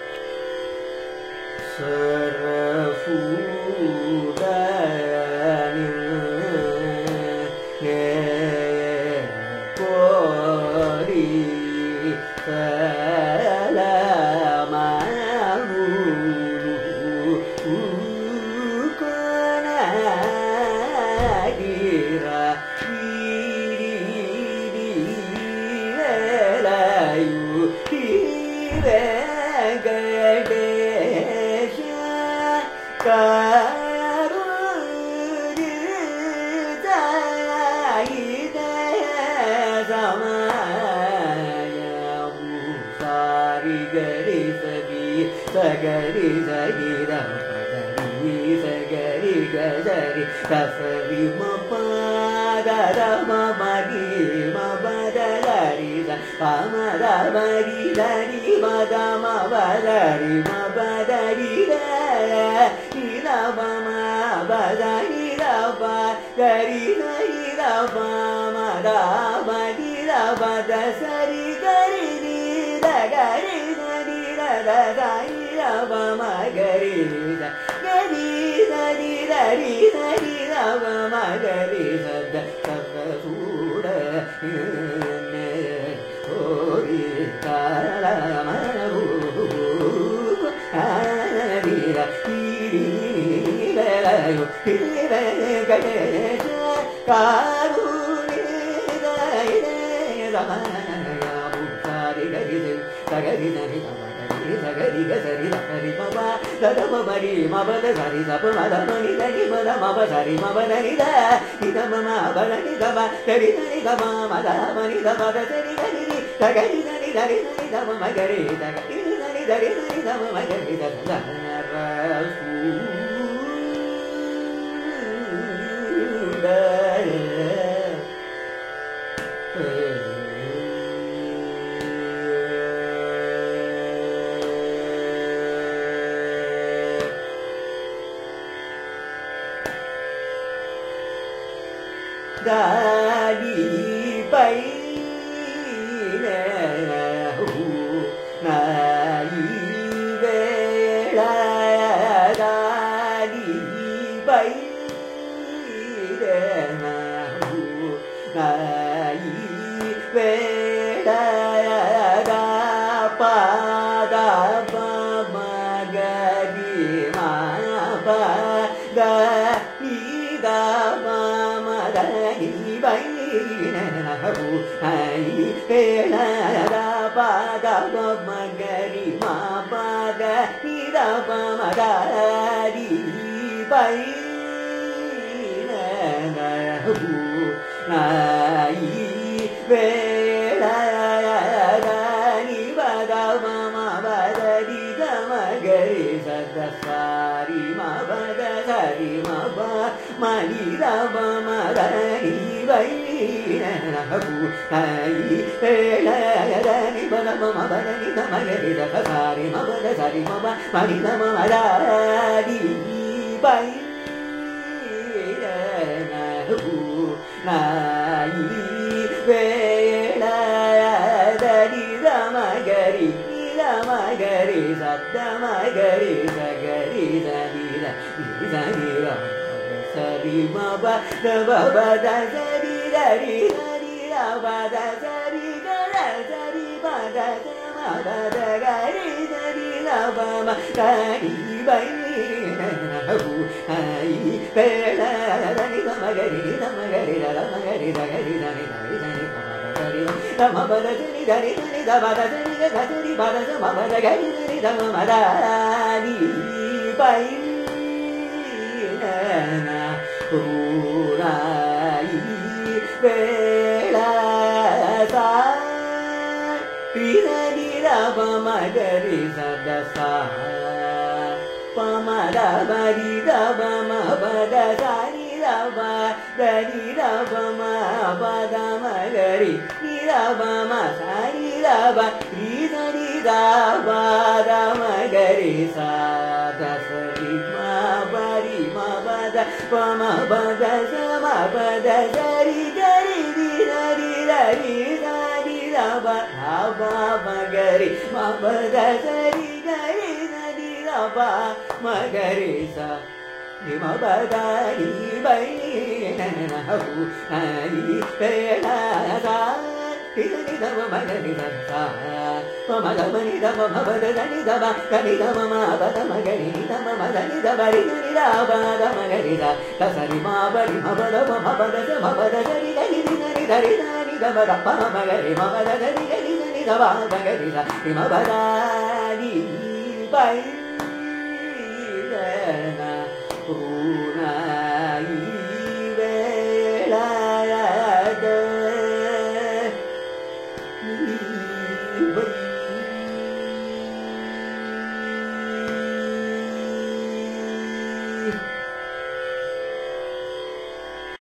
Carnatic varnam by Prasanna in Saveri raaga
Varnam is a compositional form of Carnatic music, rich in melodic nuances. This is a recording of a varnam, titled Sarasuda Ninne Kori, composed by Kotthavaasal Venkatrama Iyer in Saveri raaga, set to Adi taala. It is sung by Prasanna, a young Carnatic vocalist from Chennai, India.
music, carnatic, carnatic-varnam-dataset, iit-madras, varnam, compmusic